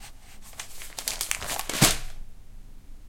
Dropping a Newspaper on a chair PCM
Falling, Field, Hit, News, Newspaper, paper, slap